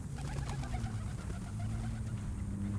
Pigeon sounds with other car and everyday sounds